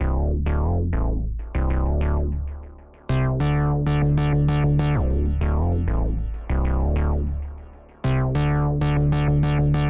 Synth BassFunk Dm 3
Funk, Hip-Hop, Ableton-Loop, Drums, Beat, Bass-Recording, Bass-Groove, Bass-Sample, Fender-Jazz-Bass, Ableton-Bass, Bass-Samples, Synth, Loop-Bass